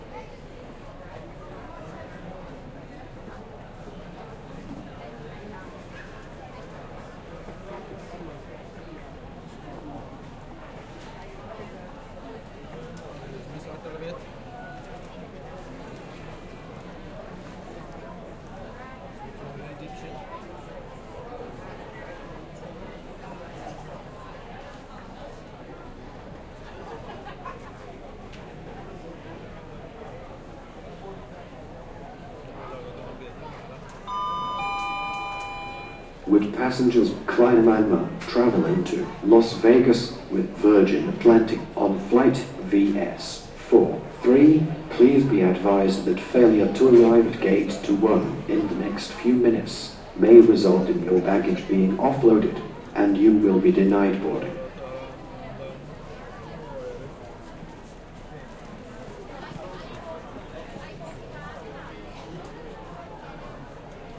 Gatwick Airport waiting area crowd noise and announcement
Waiting area at Gatwick Airport and announcement.